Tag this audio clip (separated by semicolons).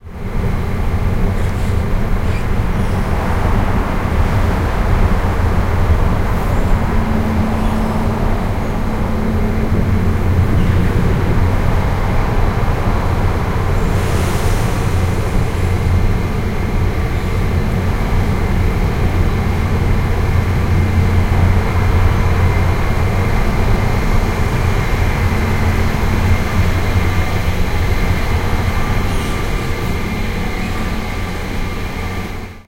korea; traffic; stairs; field-recording